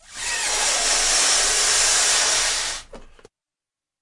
Balloon-Inflate-18
Balloon inflating. Recorded with Zoom H4
balloon, inflate